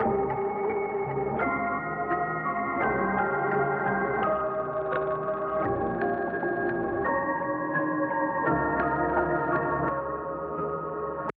Trap Melody
HipHop, Instrumental, Rap, Trap